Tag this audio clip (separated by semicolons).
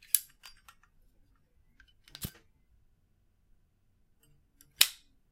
free; metal